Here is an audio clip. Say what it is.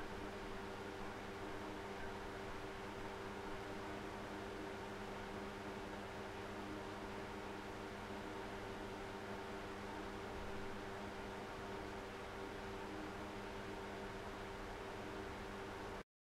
Room Ambience Fan High
A room ambiance with the fan on high.
fan, ambience, room